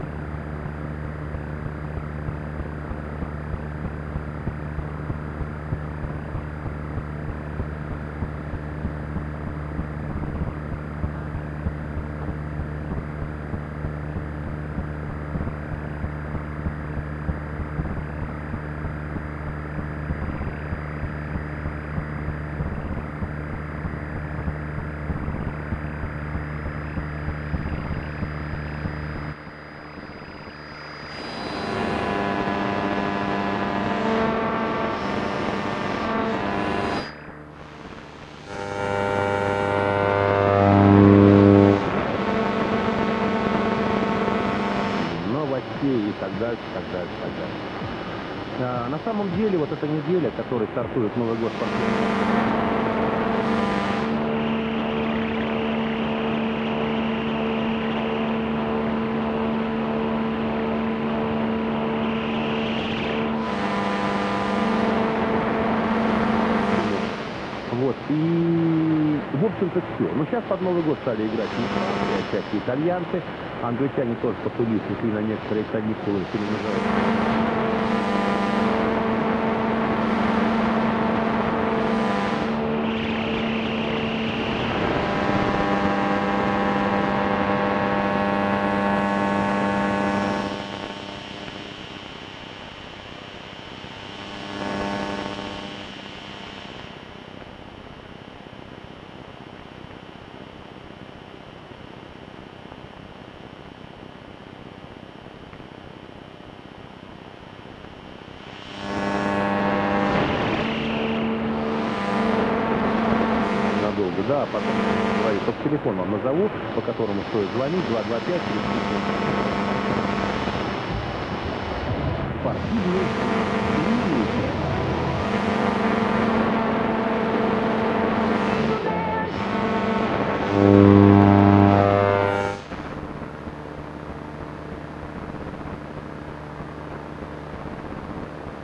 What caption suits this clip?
radio fuzz6
Another static radio fuzz.